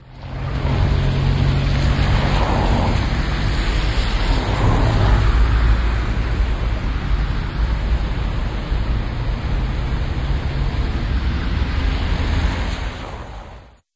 Several cars crossing Avenida da Boavista in a rainy day.